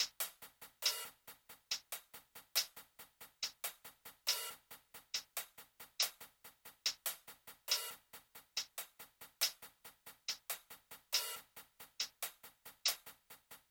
Boom Bap Hiphop Kick Snare Loop 95 BPM
95, Bap, beat, Boom, BPM, drum, hiphop, Kick, Lofi, loop, loops, music, old, pack, rap, sample, samples, school, Snare